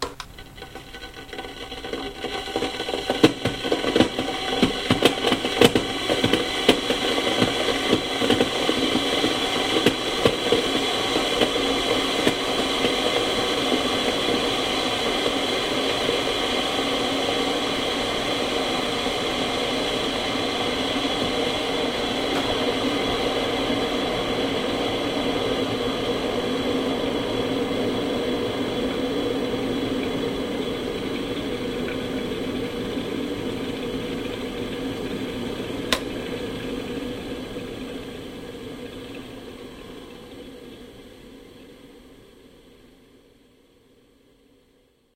A kettle being switched on, boiling, and switching itself off. A noisy kettle dut to "hard water" furring.